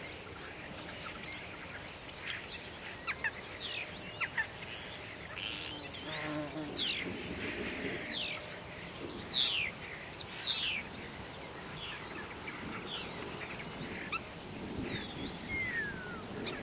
ambiance near a Visitor center at Doñana wetlands, south Spain. Mostly bird calls (sparrows, thrushes...).Soundman OKM and Sony MZ-N10 MD
ambiance, autumn, binaural, birds, marsh, nature, sparrows, thrush